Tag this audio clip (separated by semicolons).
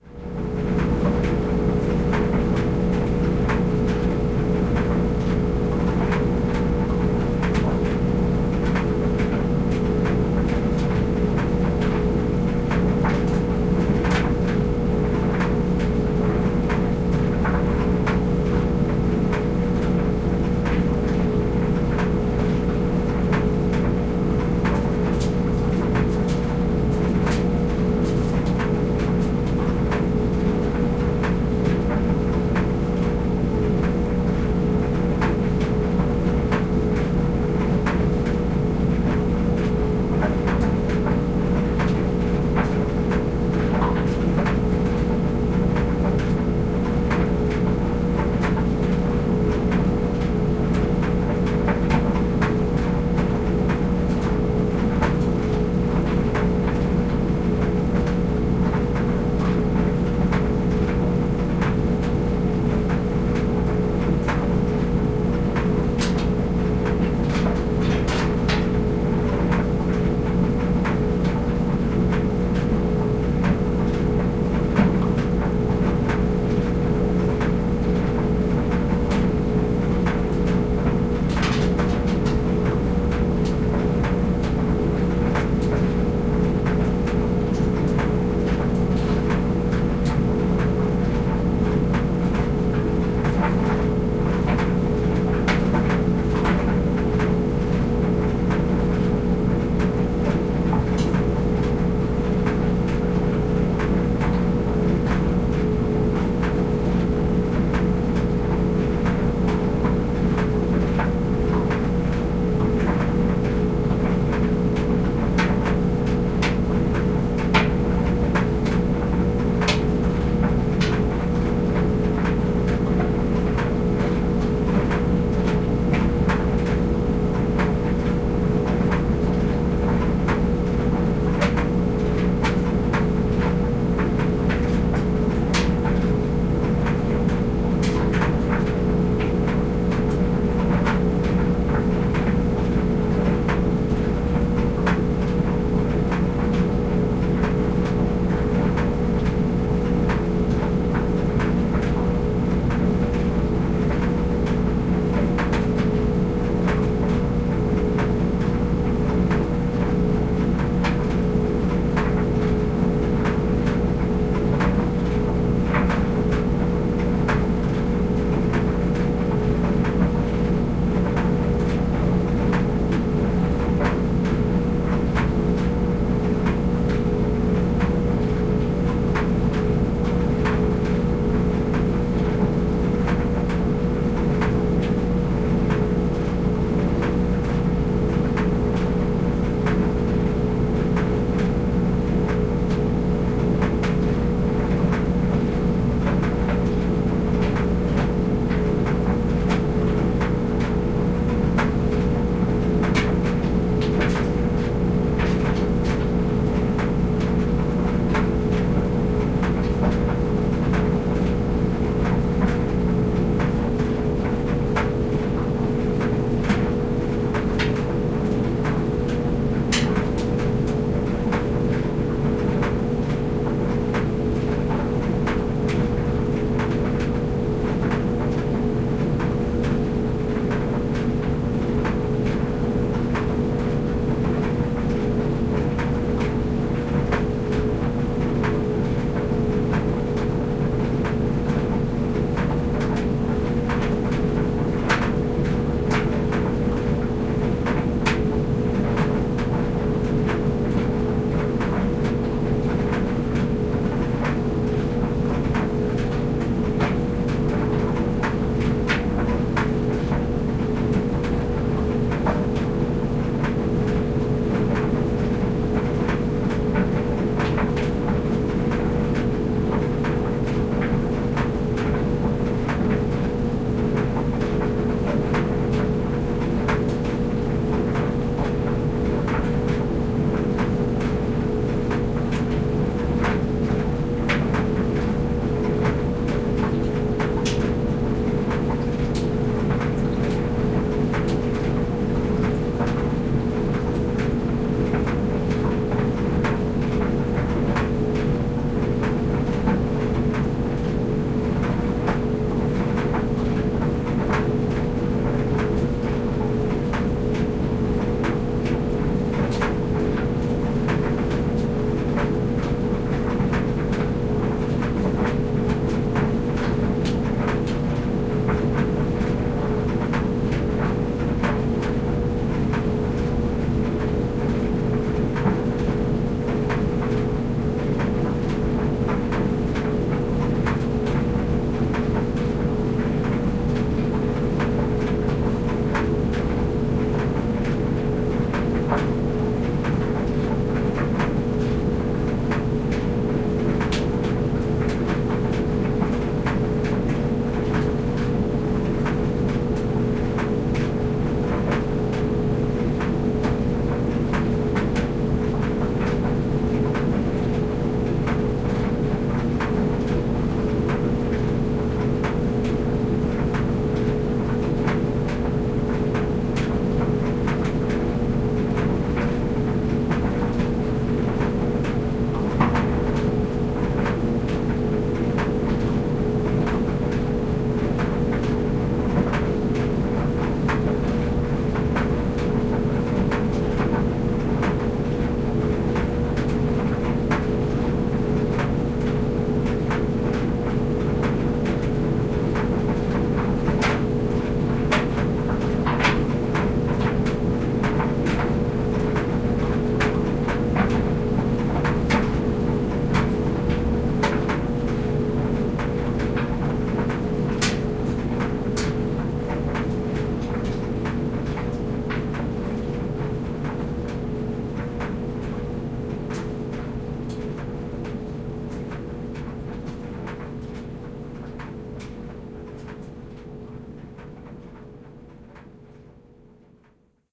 dryer; laundry; washer; basement